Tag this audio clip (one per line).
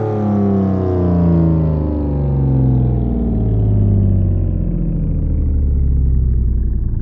violin
string